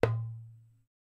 Jembay Hit 1 Center
jembay hit sample
hit, sample, jembay